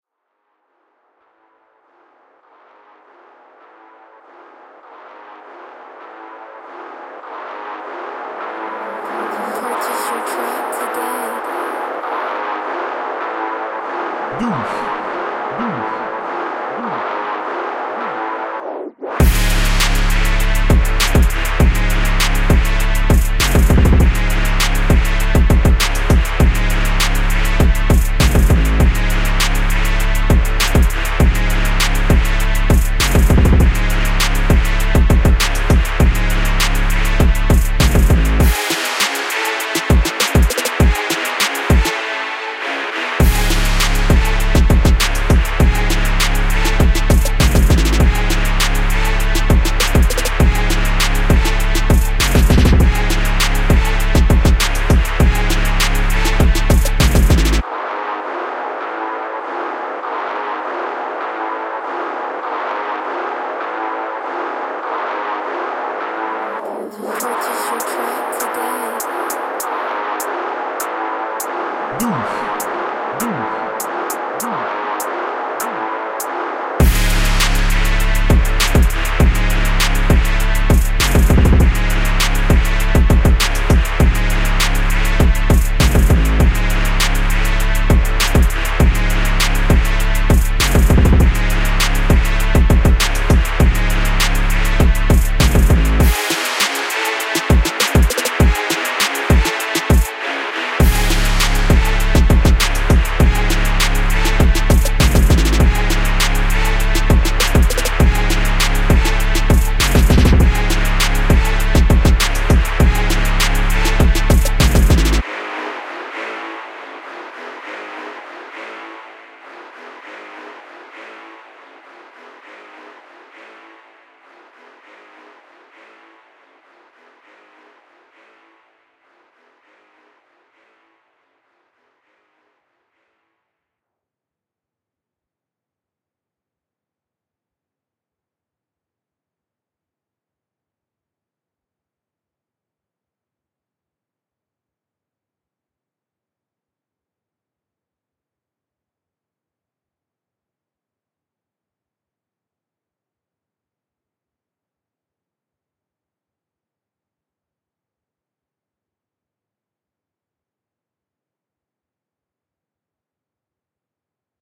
Free no profit hard trap beat.
if you advertise your song put in the title (prod.Doof)